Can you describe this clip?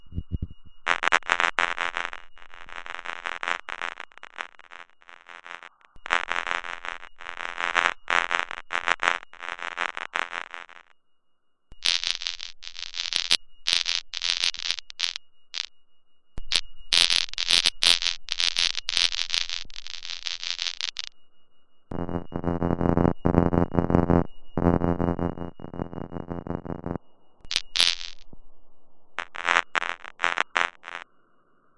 static speaker crackling
was messing around with a bass drive plugin in fl studio, and it made those sounds when turning the knobs, sounded cool
static, crackle, electric